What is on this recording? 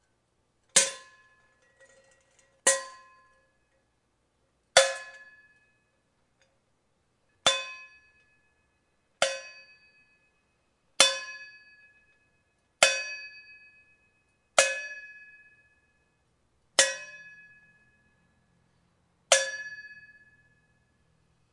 A couple of pots hitting each other.
clang, metal, pan, pot